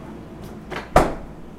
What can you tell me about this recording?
Fridge door close
close, door, fridge